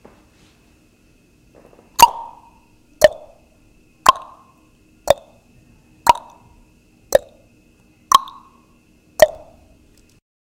Me making a tick tock noise with my tongue.